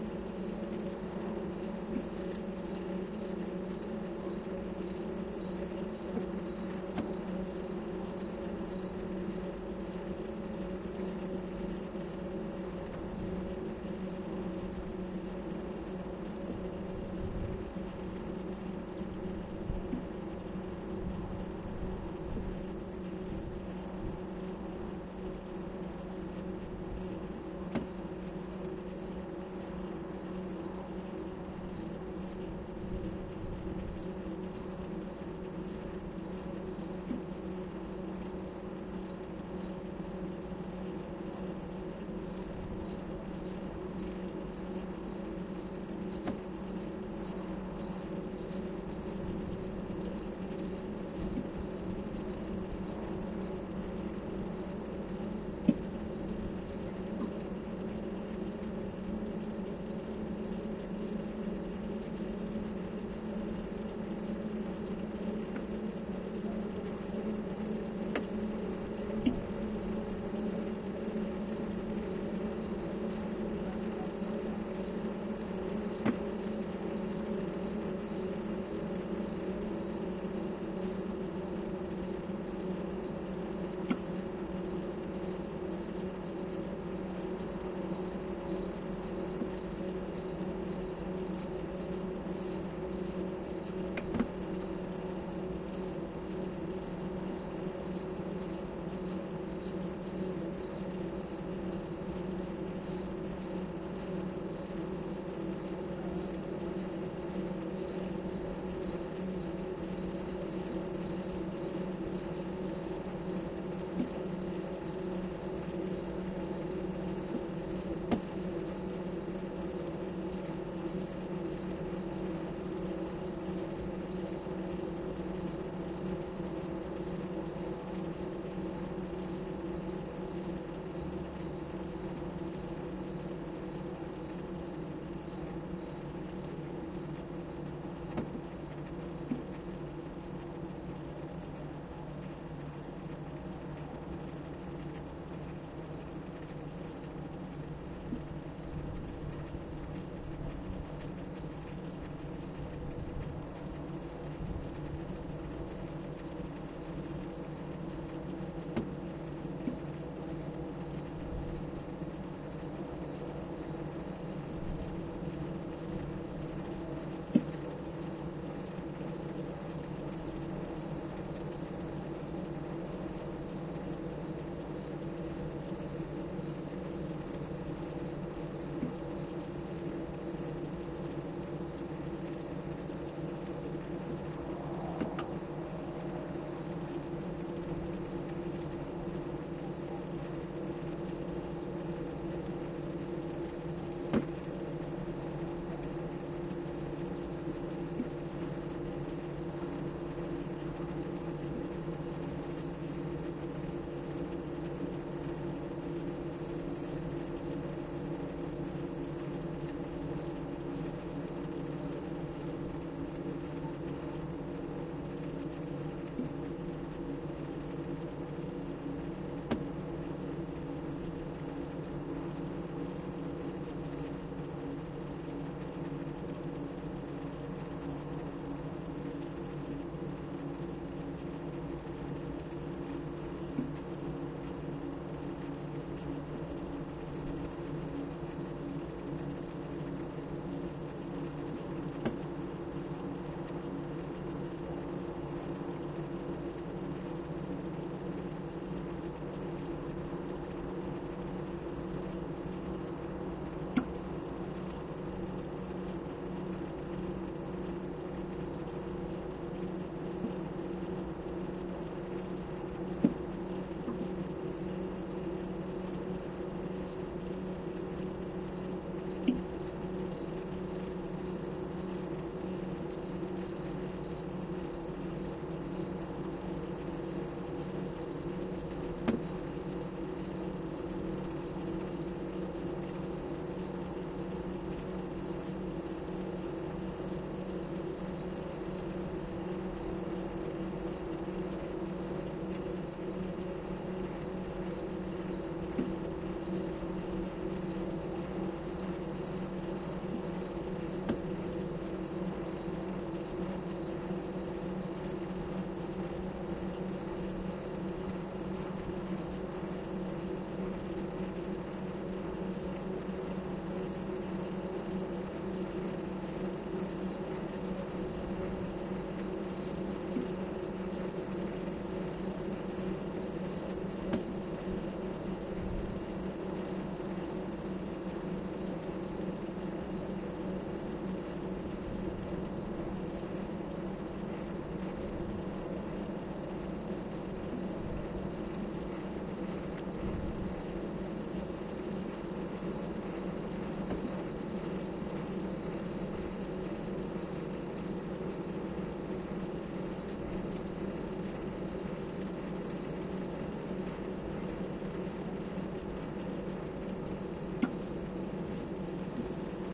the sound of the old railway cables rear
old, cables